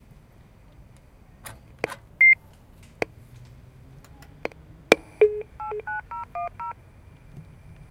20141119 barrier H2nextXY
Sound Description: pushing button sound, dialing a phone number
Recording Device: Zoom H2next with xy-capsule
Location: Universität zu Köln, Humanwissenschaftliche Fakultät, Danteweg
Lat: 50.934932
Lon: 6.921444
Recorded by: Lia Wang and edited by: Carina Bäcker
This recording was created during the seminar "Gestaltung auditiver Medien" (WS 2014/2015) Intermedia, Bachelor of Arts, University of Cologne.
barrier, cologne, dialing-tone, field-recording, university